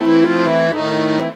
Accordeon riff. Recorded with binaural mics + CoreSound 2496 + iRivier H140, from 1m distance.